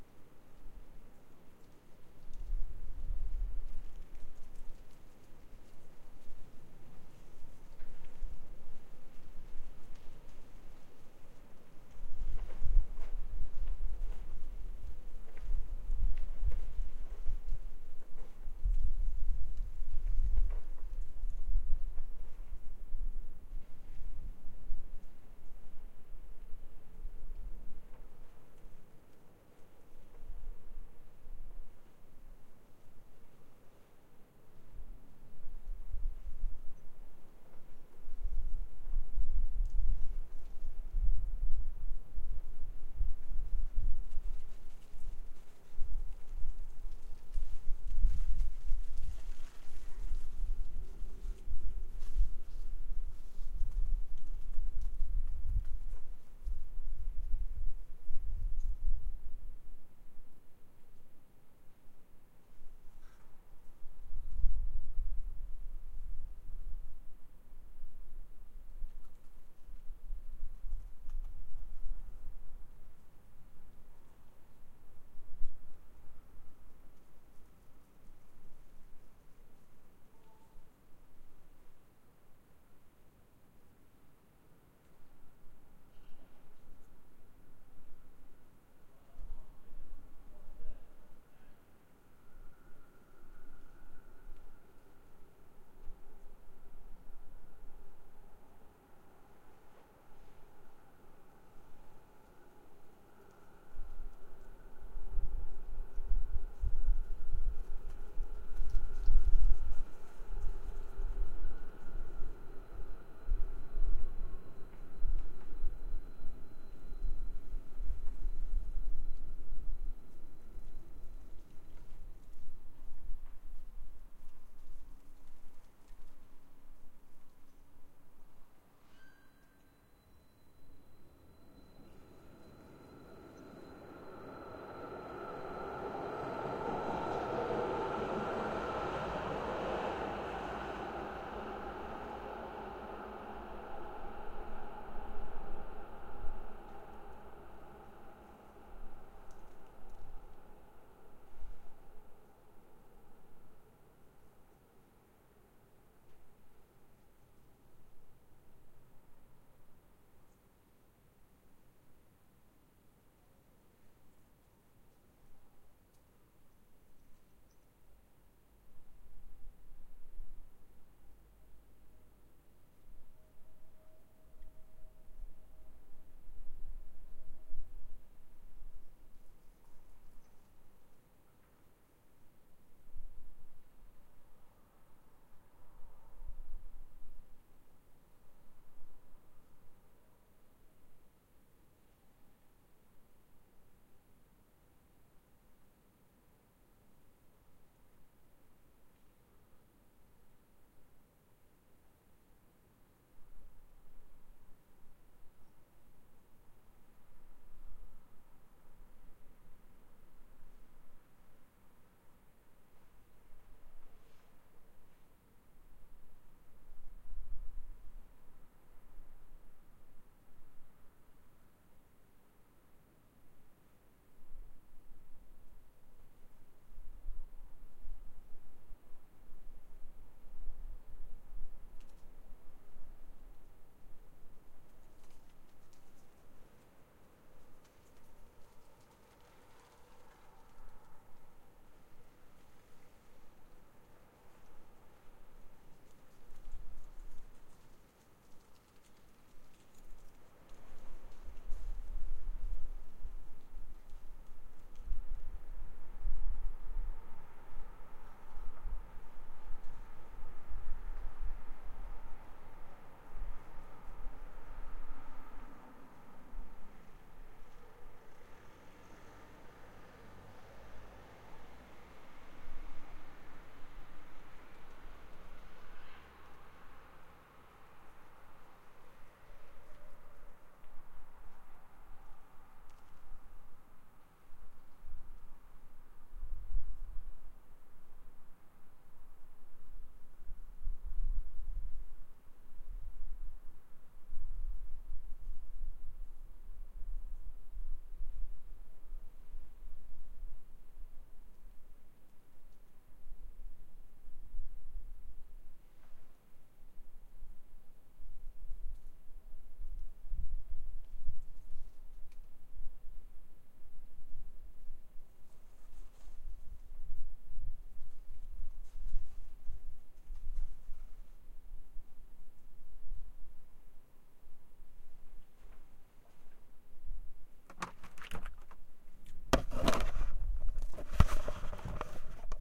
Night atmosphere Wind City